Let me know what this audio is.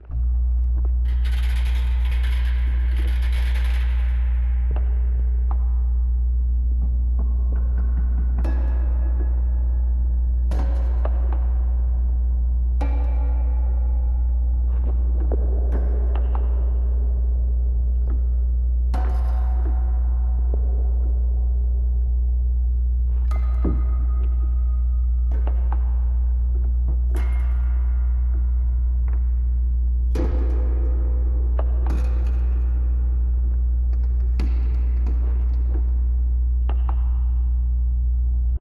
Eerie Ambience
This is a 50-second dark and spooky space-horror-esque ambiance I made with: A fan, a very creaky window, and some old trash-can lid scrapes and bangs I recorded a few years ago.
Sci-fi, Dark, Eerie, Spooky, Ominous, Atmosphere, Ambience